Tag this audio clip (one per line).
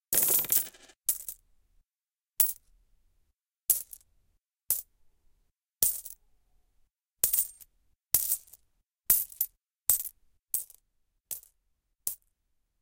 Casual
Coin
Money
Sound-Design
Video-Game